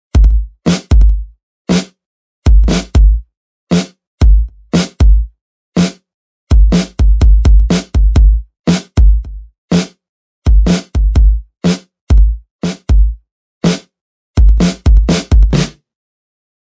KC MUS152 pop beat
a standard beat
MUS152, beats, drums